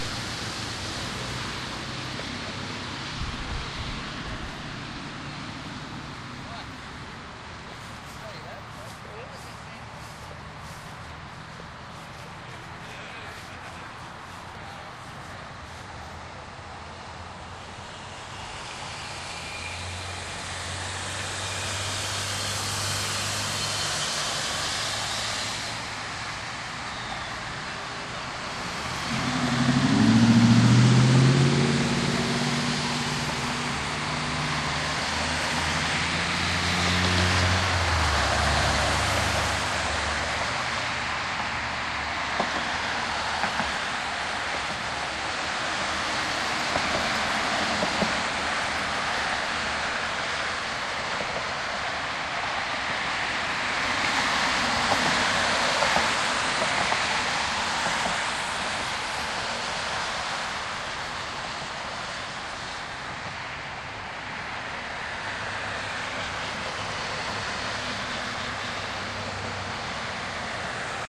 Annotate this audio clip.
Standing alongside the road, Saturday night, 6/27 on our stop before heading out to Washington DC in the morning to protest against everything possible. The carpet had piss stains and dead bugs and cobwebs were everywhere. $40 bucks well spent.